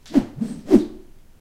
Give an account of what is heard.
wind swing 31
I use a bamboo stick to generate some wind *swoosh* sounds.
Find more similar sounds in the bamboo stick swosh, whoosh, whosh, swhoosh... sounds pack.
This recording was made with a Zoom H2.
stick, wisch, swish, zoom, swoosh, woosh, swosh, whip, flup, attack, public, air, whoosh, wind, weapon, h2, swhish, swash, punch, cut, zoom-h2, bamboo, swing, domain, wish, luft